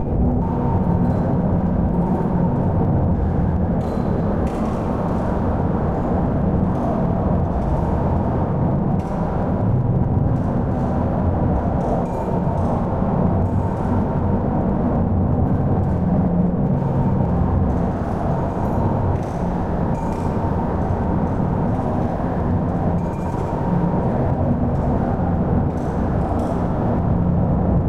Storm noise
ambience, ambient, atmo, atmos, atmosphere, atmospheric, background, background-sound, Boom, general-noise, indoor, Rain, soundscape, Storm, Thunder, Thunderstorm, Weather, wind